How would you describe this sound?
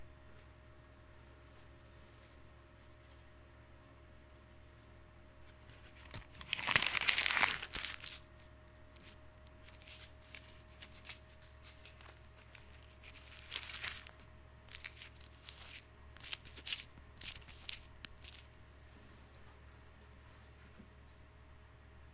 Crunching up a Tunnock's Caramel wrapper slowly in my hand.
Recorded using a Blue Yeti Microphone.
Crunch, Foil, Effect, Wrapper